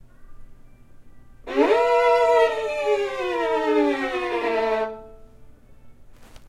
Low slide and wail
A slide up and a long wail down on the lower register of the violin. Creepy and maybe suspenseful sound.
creepy, evil, horror, scary